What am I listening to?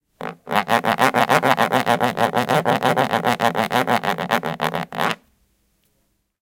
mySound MB Sidney
belgium, cityrings, mobi, sidney